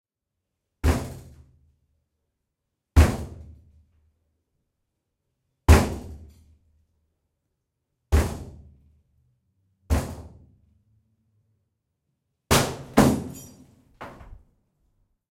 Knocking out the door by foot
This sound was recorded during a special door knock for the short film "Painting" on a Zoom H6 recorder (XY capsule) and a Sennheiser ME-2 lavalier microphone
door, down, hard, knock, knocking, out, wooden